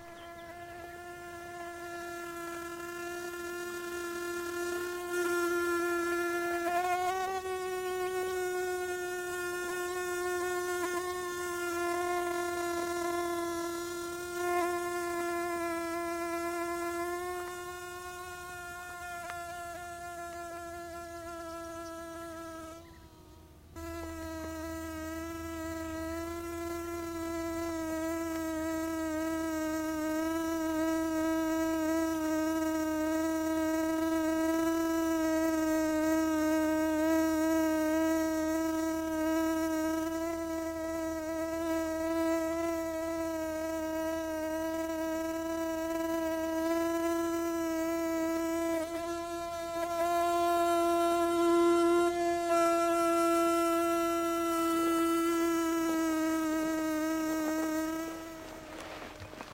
mono recording of a bee-fly. Sennheiser ME62 into iRiver H120 / grabacion de un bombílido